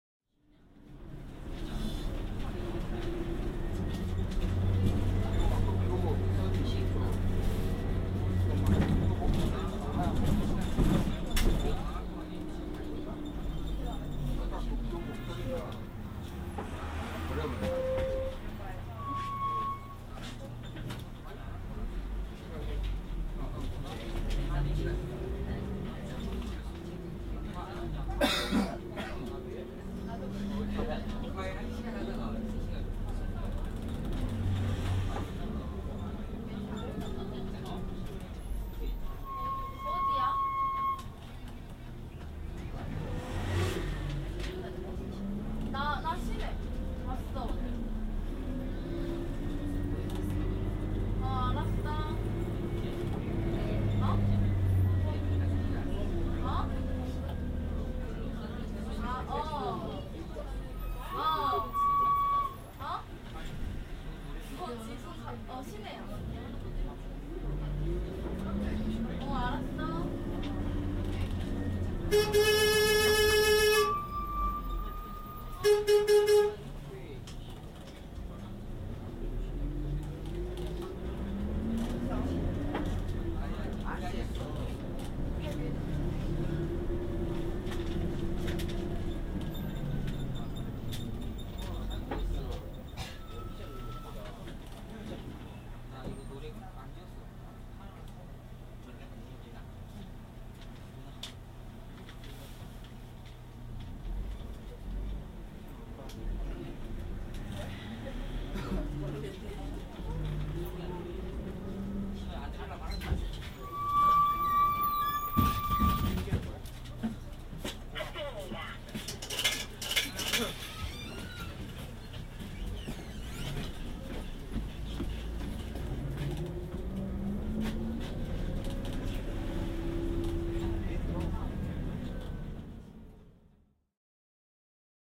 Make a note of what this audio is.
Ambience City Bus 13
Ambiance recorded in a city bus travelling through the streets of Mokpo, South Korea. Some bus noise, some Korean chatter, the doors open and close, people get on the bus and get off the bus.
ambiance, bus, korean